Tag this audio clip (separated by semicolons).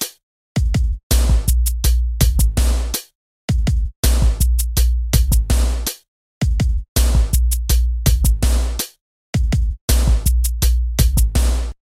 rhythm; digital; dance; beat